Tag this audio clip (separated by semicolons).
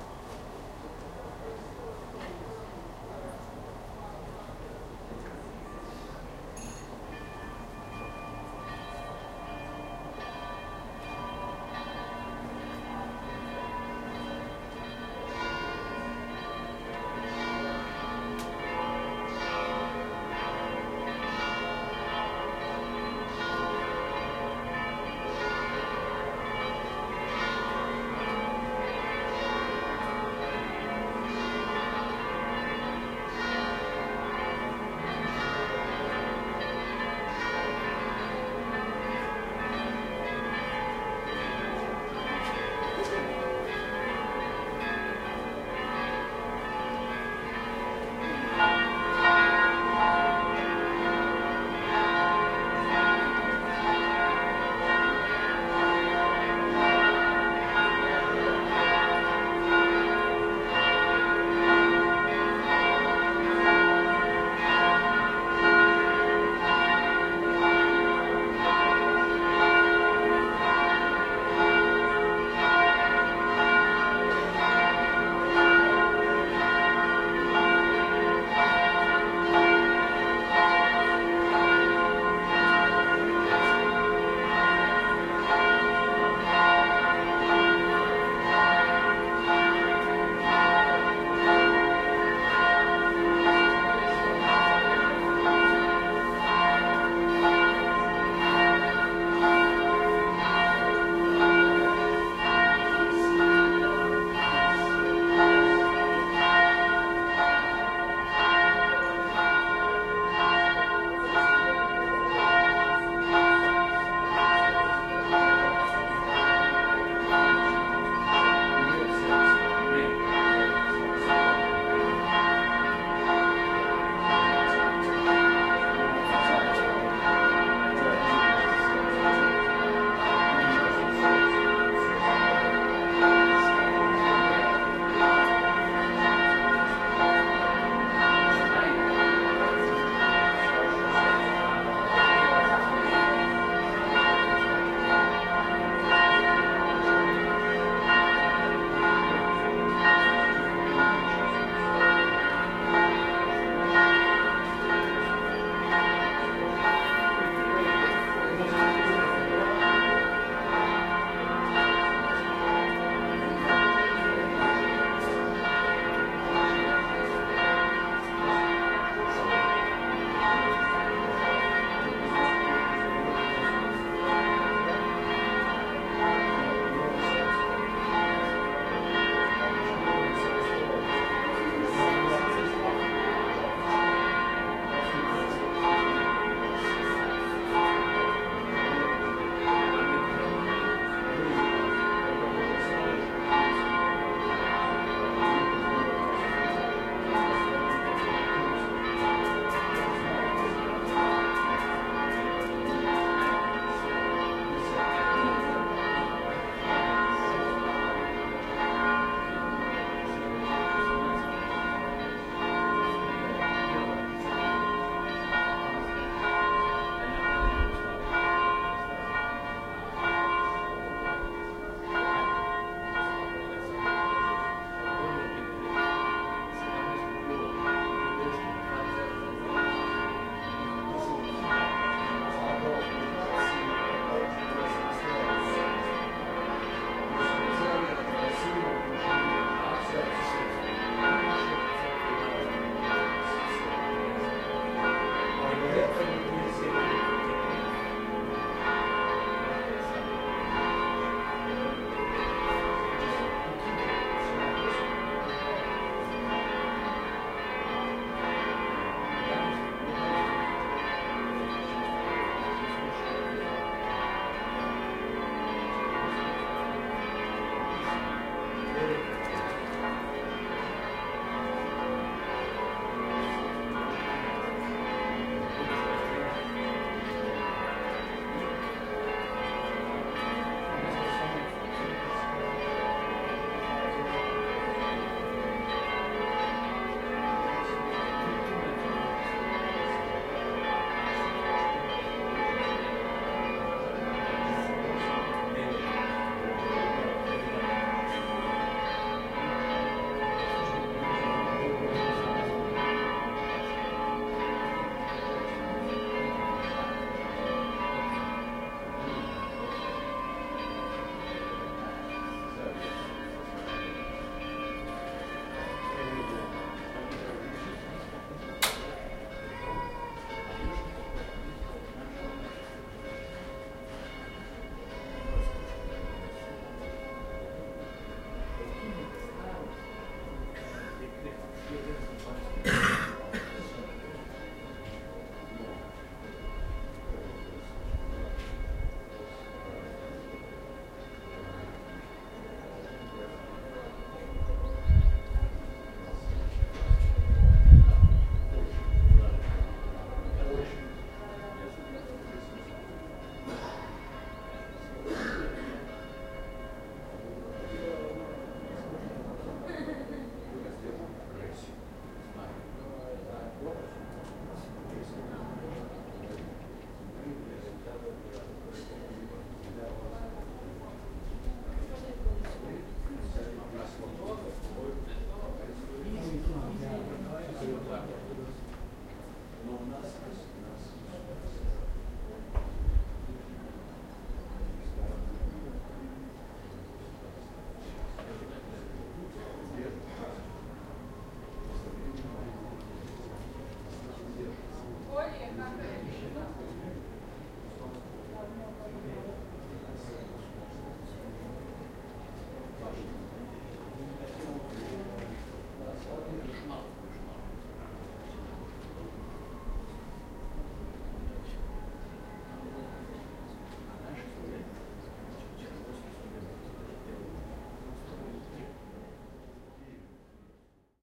clock; chime; bells; church-bell; ringing; cathedral; bell; ring; church; dong; clanging